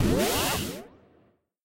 Pick-up Fuel
From a collection of sounds created for a demo video game assignment.
Created with Ableton Live 9
Absynth
Recording:Zoom H4N Digital Recorder
Bogotá - Colombi
Fuel, electronic, Video-game